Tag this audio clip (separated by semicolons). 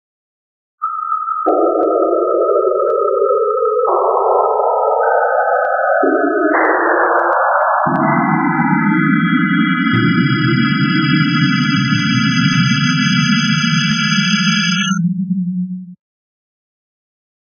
alien; synthetic; ambient; space; eerie; sci-fi